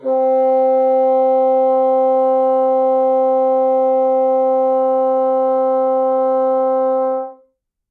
One-shot from Versilian Studios Chamber Orchestra 2: Community Edition sampling project.
Instrument family: Woodwinds
Instrument: Bassoon
Articulation: sustain
Note: C4
Midi note: 60
Midi velocity (center): 95
Microphone: 2x Rode NT1-A
Performer: P. Sauter
sustain, midi-note-60, c4, single-note, woodwinds, vsco-2, midi-velocity-95, bassoon